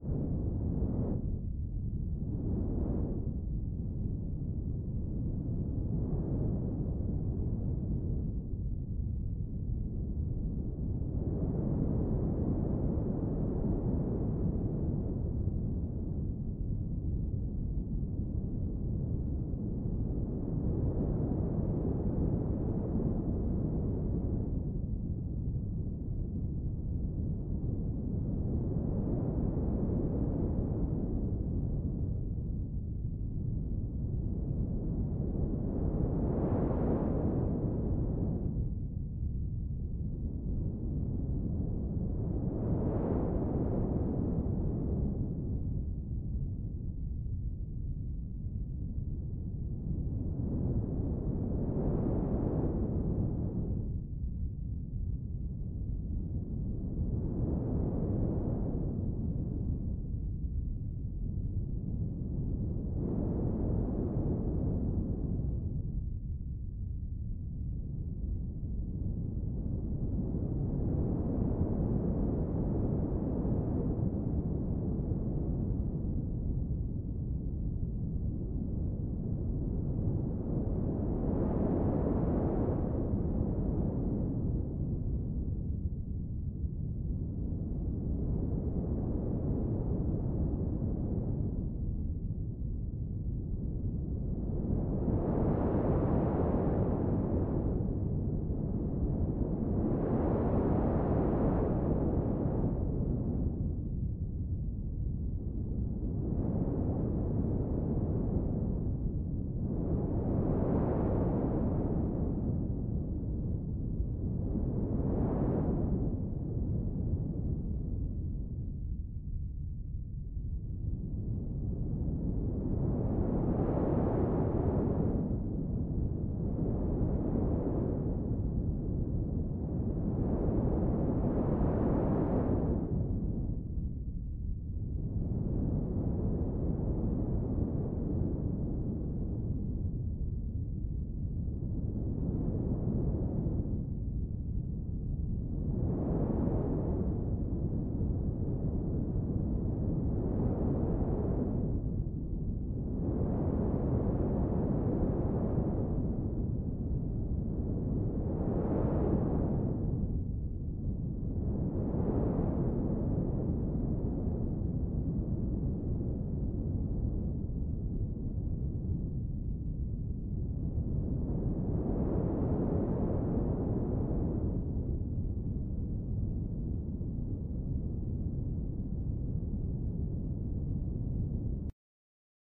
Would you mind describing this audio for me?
Wind-ESP-Monophonic-synth
A wind ambience sound created with Logic Pros ESP Monophonic synthesizer using the Noise generator and frequency cutoff-filter.